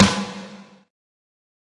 Snare Drum
pow, boston, crack, kack, huge, Metal, cack, epic, klack, loud, boom, big, rock